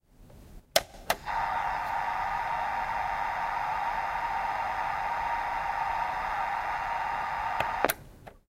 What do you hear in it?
CBC electromagnetic sound 2
This is a sound recorded with a handcrafted electromagnetic microphone (that is why is in mono format). The machine recorded is used for signal processing tasks at CBC (UPF) for their experiments on brain cognition.
noisy, cognition, cbc, brain, machine, electromagnetic, experiment, upf